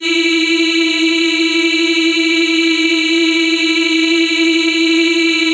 These were made for the upcoming Voyagers sequel due out in 2034.
cinematic, evil, foley, granular, space, synthesis, synthetic